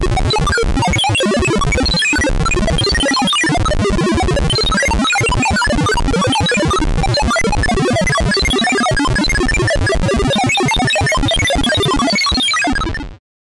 Computer Does Calculations 3 (Long)
A selection of fast-paced low and high beeps that play for a rather long period of time. that sound rather like the way sci-fi represents computers working.
computer, machine, operating